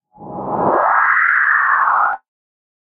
SFX suitable for vintage Sci Fi stuff.
Based on frequency modulation.
synth, vintage, demon, scifi, demonic